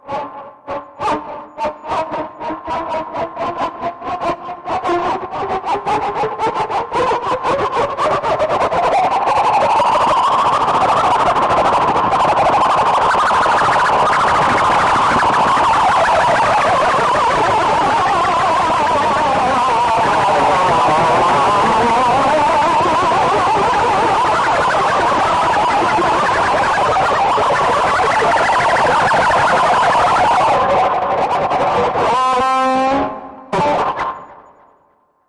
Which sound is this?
Sounds produced scratching with my finger nail on the strings of an electric guitar, with lots of distortion applied. The original mono recording was done with an Edirol UA25 audio interface, then dubbed to stereo in Audition. I also played a bit with channel panning. This set of samples are tagged 'anger' because you can only produce this furious sound after sending a nearly new microphone by post to someone in France, then learning that the parcel was stolen somewhere, and that you've lost 200 Euros. As it happened to me!
(Ok, I'll write it in Spanish for the sake of Google: Esta serie de sonidos llevan la etiqueta 'ira' porque uno los produce cuando mandas un microfono por correo a Francia, roban el paquete por el camino y te das cuenta de que Correos no indemniza por el robo y has perdido 200 Euros. Como me ha pasado a mi)
distortion, anger